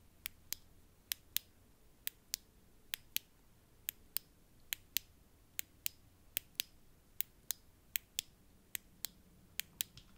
A generic click
Click, Sound-effect, Percussion